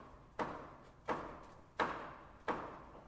industrial hammer wood distance2
hammer banging a nails 10m away
distance, frames, hammer, house, wood